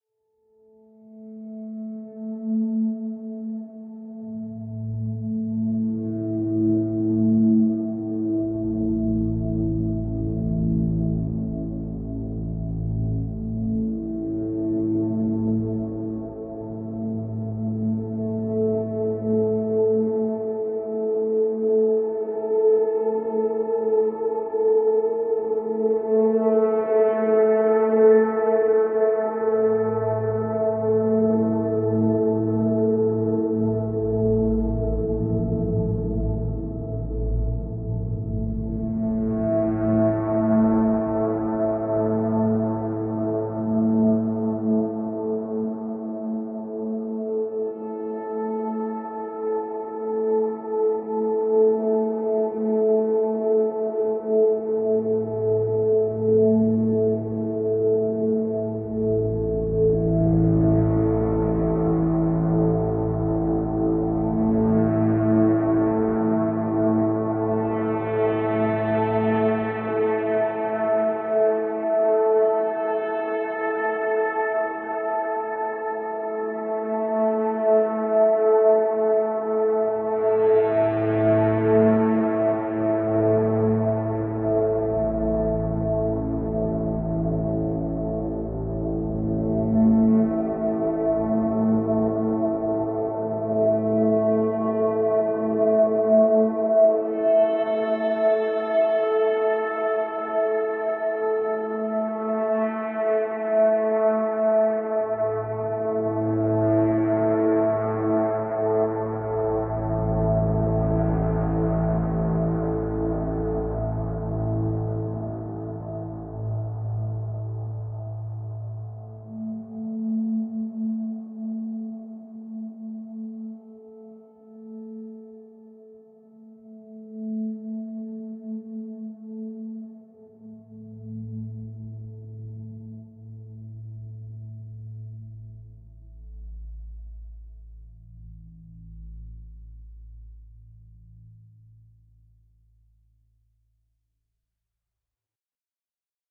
Ambient synth in A 10052016
Arturia MicroBrute synthesizer through Guitar Rig on low quality direct into the built-in tape deck.
Cut in Ocenaudio.
It's always nice to hear what projects you use these sounds for.
Please also check out my pond5-profile for more:
ambience, ambient, analogue, atmosphere, digital, drone, electronic, hybrid, pad, processed, retro, sci-fi, soundscape, space